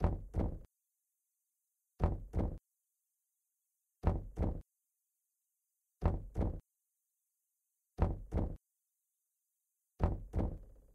I hope u guys enjoy it and this is what the original pretty much sounds like when you have your volume down.